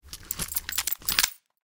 Weapons GunUnHolster
The sound of a gun being drawn or unholstered, with a bit of gear movement mixed in.
click, clothing, draw, gun, jingle, metal, pistol, put-away